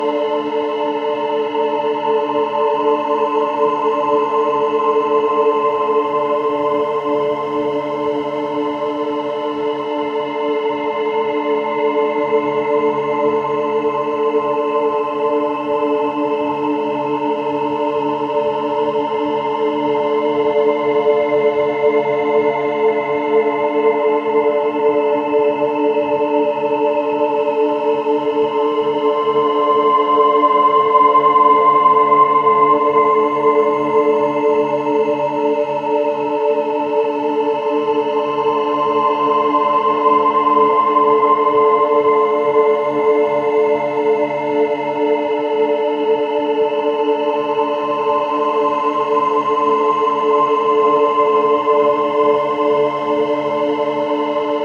Fragment from A DISTANT PAST [loop]
Arturia MicroBrute with some additional modulation coming from a Doepfer A-100.
Processed by a Zoom MS-70CDR mulit-effects pedal and captured with a Zoom H5 portable recorder.
Some slight tweaks in the box.
Originally I used it for this piece/video:
It's always nice to hear what projects you use these sounds for.
ambience, ambient, analog, atmosphere, creepy, dark, dark-ambient, digital, drone, eerie, haunted, horror, loop, scary, sinister, spooky, weird